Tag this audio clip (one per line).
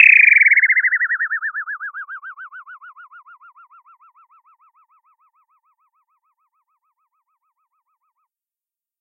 frequency-modulation
low-pass
lowpass
oscillator